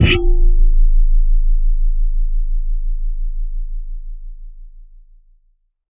tweezers scrape 2
Tweezers recorded with a contact microphone.
close, contact, fx, metal, microphone, sfx, sound, soundeffect, tweezers